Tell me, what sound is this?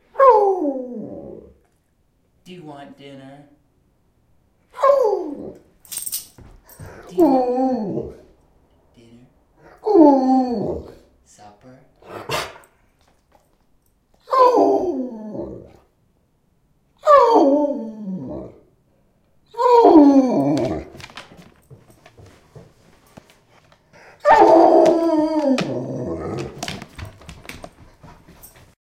Igor Dinner Anticipation
A recording of my Alaskan Malamute, Igor, while he is waiting for dinner. Malamutes are known for their evocative vocal ability. Recorded with a Zoom H2 in my kitchen.
growl, howl, husky, malamute, sled-dog, wolf